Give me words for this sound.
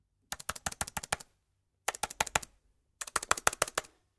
Computer keyboard - Pressing fast and hard
Angry or impatient clicks and presses on keyboard. Recorded with Sennheiser MKE600 boom mic into Zoom H5.
angry, annoyed, button, click, clicks, computer, effect, fast, finger, game, hard, impatient, keyboard, laptop, nervous, press, pressing, sfx, sound, technology, typing, user, waiting, writing